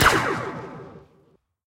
enhanced blaster 3
Star wars blaster shot. Made with a cable strike mixed with a gun shot.
blaster, gun, laser, Star-wars